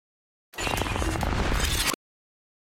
Rubber Crunch whoosh
Whoosh that goes from rubber to crunchy metal with a sucking punch at the end. No reverb.
whoosh, rubber